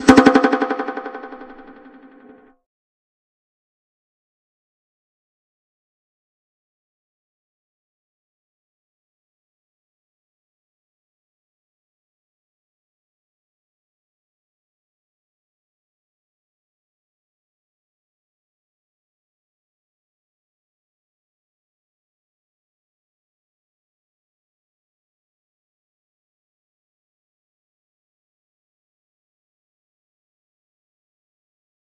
percussive sound put through a Roland Space Echo